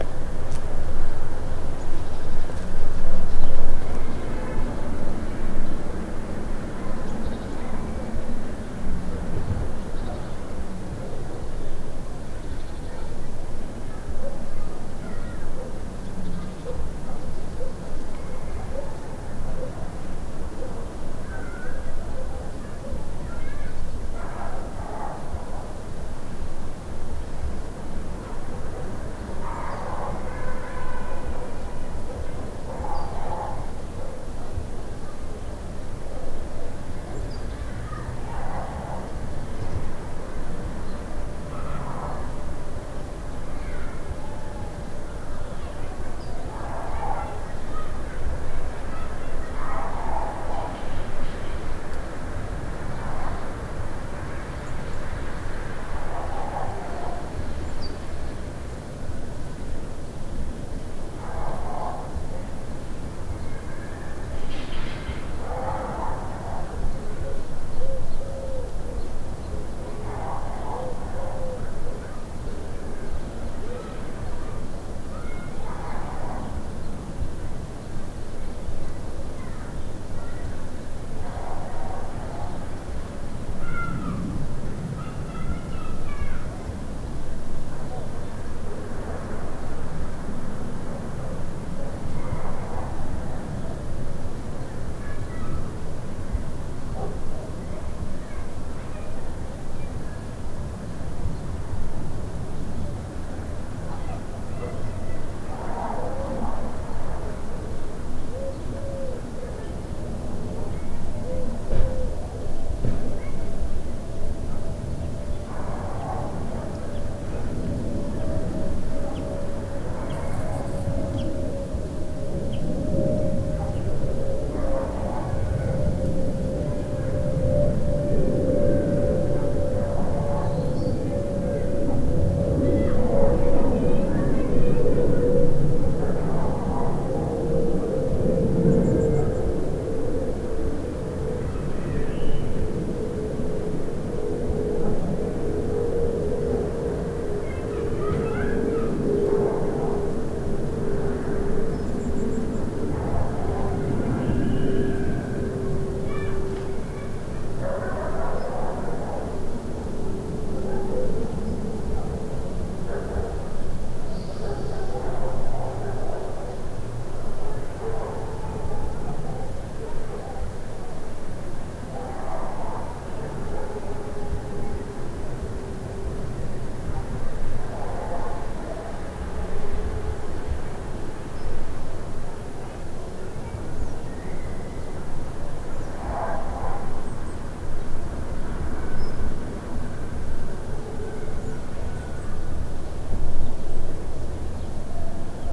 outdoors ambient distant village
village, ambient, outdoors